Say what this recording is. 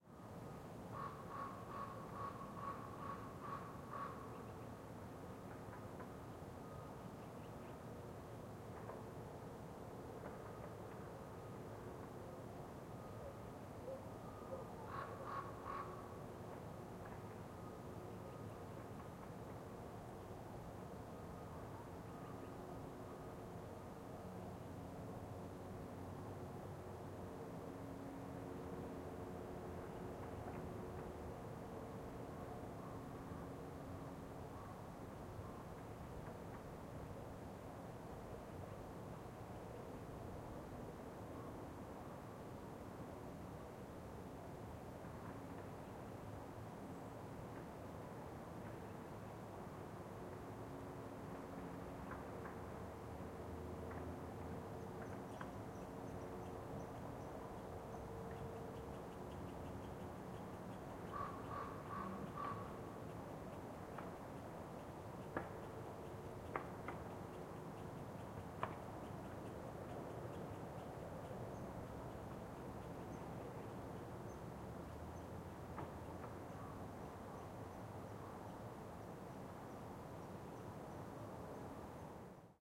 ambience winter crow birds distant traffic construction
Winter ambience in Banff, Alberta. Crows and various birds can be heard, as well as distant traffic and construction. Recorded on an H2N zoom recorder, M/S raw setting.